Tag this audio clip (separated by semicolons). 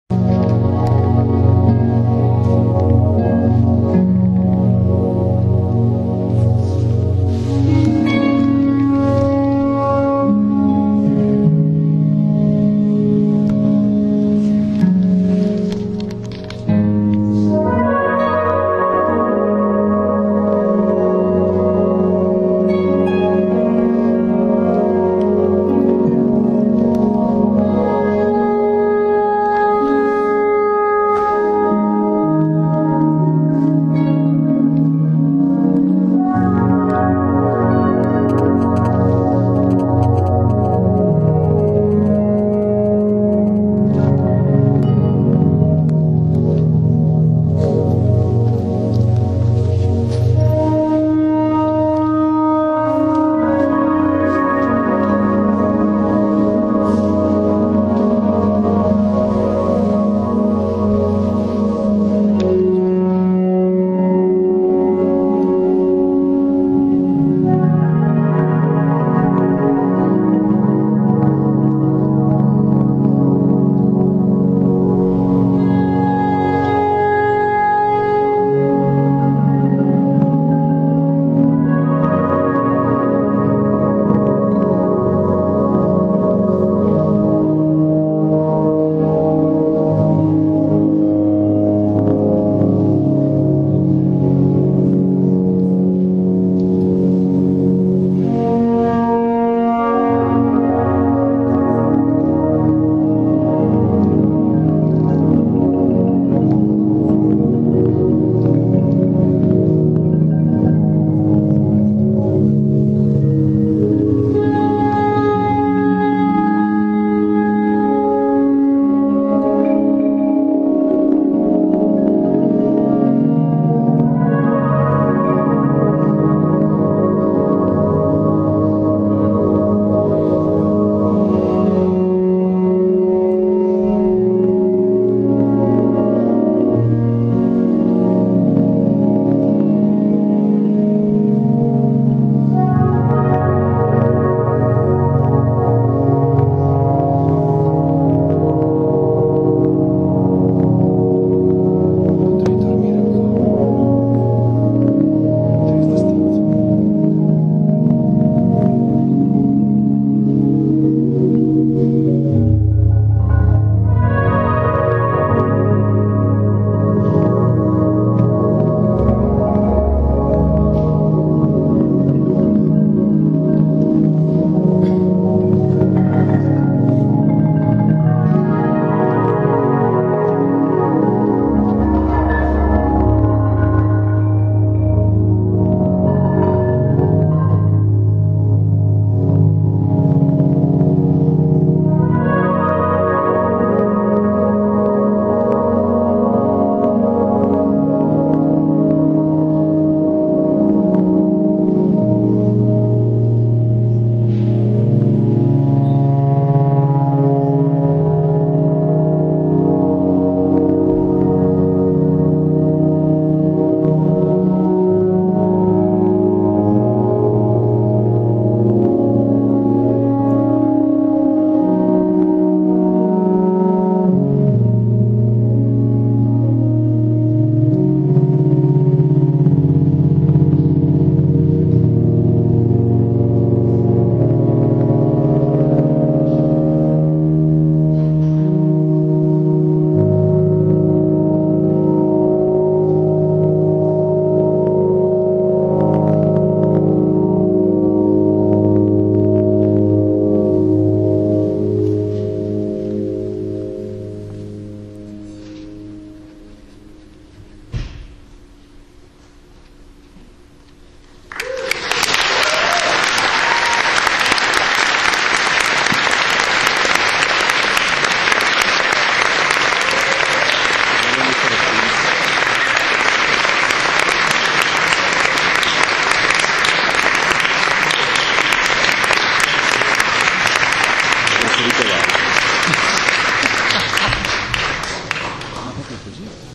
installation; ableton; loop2017